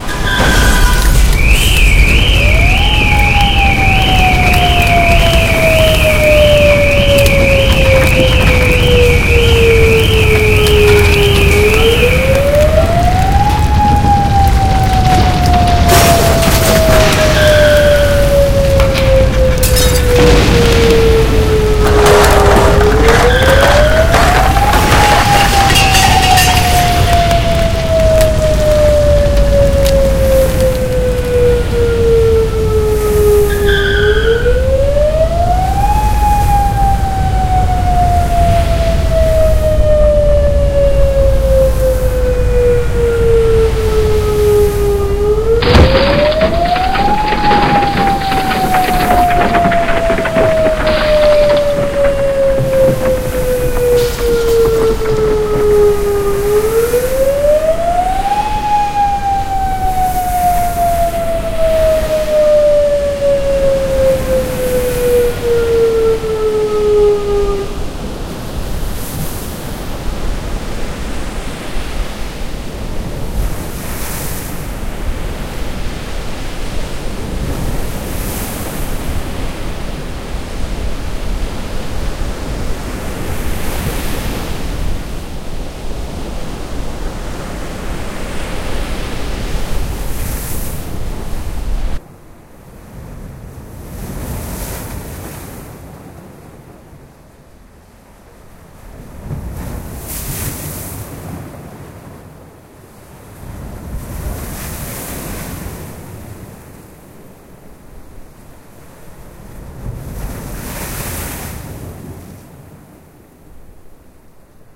Natural Disaster
A mixed file of a vicious earthquake then a tsunami rolling in